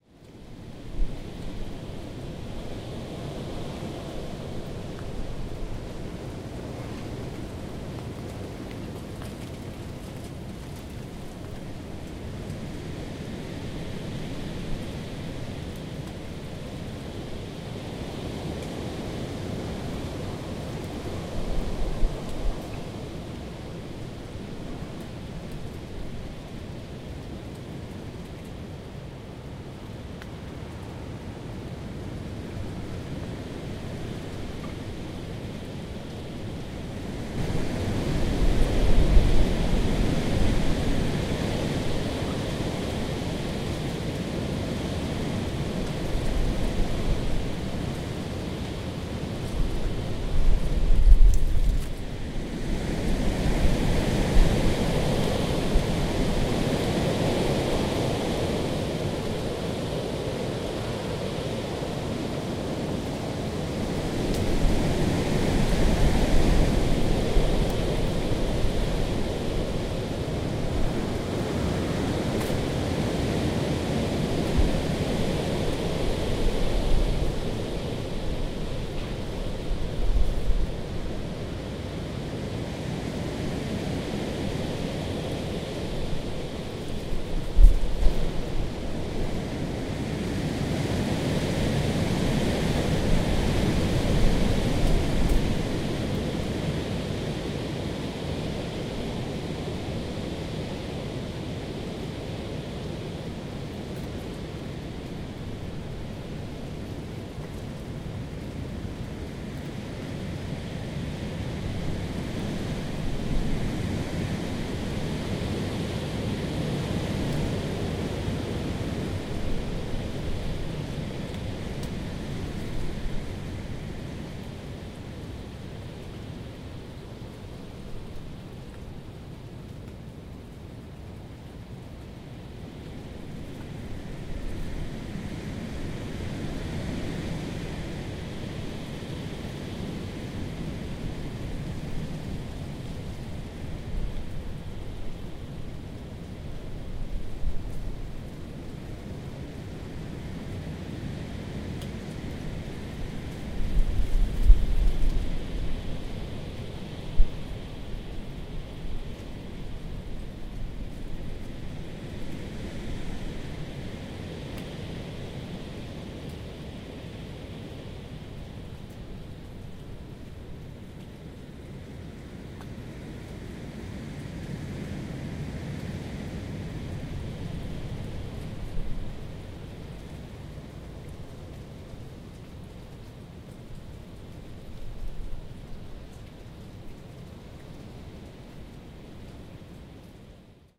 WindDec14th20154AM
A bunch of gusts as a warm moist mass of air from the south steamrolls over southern Illinois on, believe it or not, December 14th 2015. The temperature outside when I recorded this was 65 degrees! Not quite the norm for this time of year. Recording made with the Handy Zoom H4N recorder using the internal microphones.
winter, wind, field-recording, nature, bleak, weather, gusts